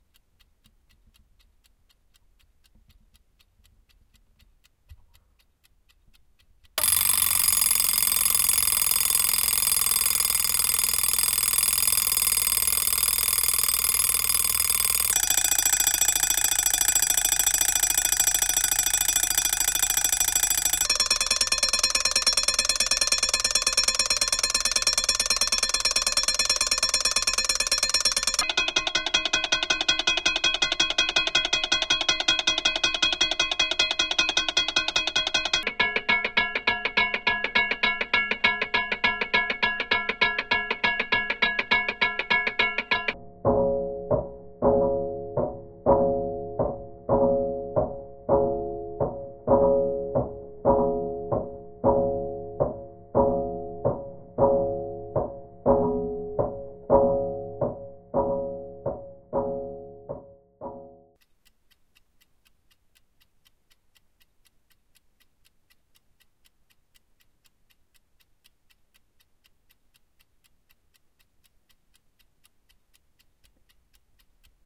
Tabletop clock ringing, speed ramp down
Small tabletop clock's alarm ring going off.
Sort of a followup. Same clock as before, quite an anemic bell but sounds funny at the slowest speed.
Speed ramping is something like 70%, 50%, 30%, 20%, 5%
clockwork, clock, ringing, ticking, ring, tick